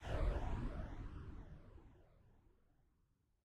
A noisy sound similar to a plane quickly flyby 2/2